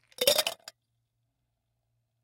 Ice Into Martini Shaker FF292
Dropping ice into martini shaker, ice hitting metal
ice, martini-shaker